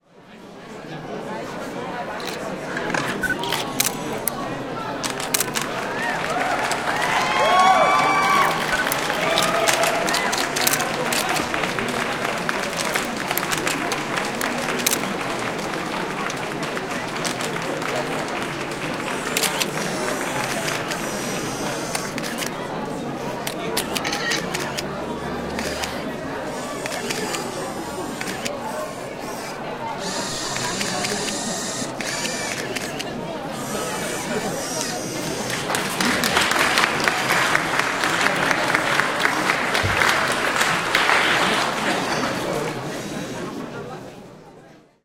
Soundscape. Mix recording of unveiling a new product. Applause, background chat and plenty of cameras clicking. No speech included but you can add some yourself by editing this recording.
I have used these sounds: